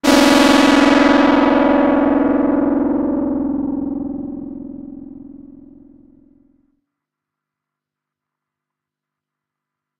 Lazer sound generated with multiple square waves form modular synth. PWM and frequency modulation on both sources, mixed with white noise.
gun, lazer, science-fiction, sci-fi, sound-effect, weapons